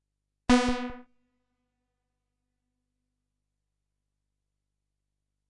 A sawbass sound recorded from the mfb synth. Very useful for stepsequencing but not only. Velocity is 127.